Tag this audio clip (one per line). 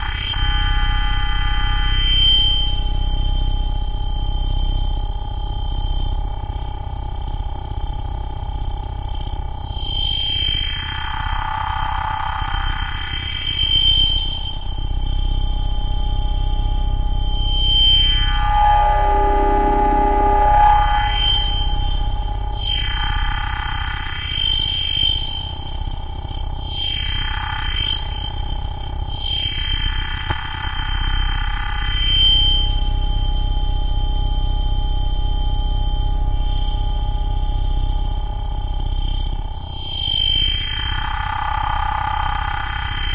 abstract
electro
granular
mutant
mecha
bad
ambience
noise
horror
criminal
cinematic
dark
creepy
future
drama
experiment
atmosphere
film
effect
ambient
monster
illbient
movie
lab
filter
fear
alien
bakground
pad
drone